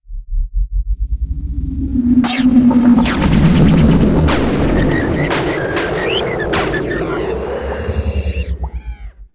Part of a series of portal sound effects created for a radio theater fantasy series. This is the sound of the portal when it malfunctions, in medium-duration form.